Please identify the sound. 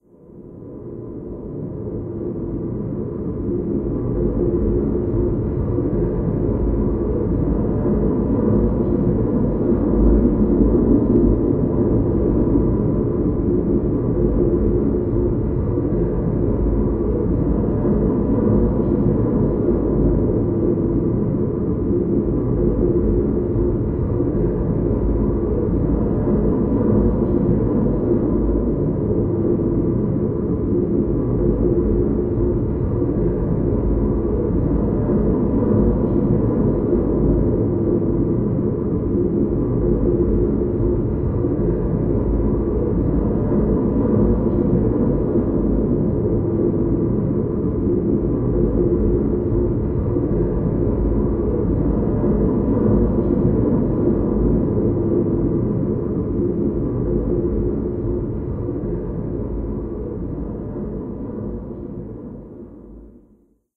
Dark Ambience 2
Yet another ambient sound effect made from a recording I found of a kettle heating up water. Recorded with a Tascam DR-40.
;) Thank you!
1-min, background, Field-recording, scary, soundscape, tascam, water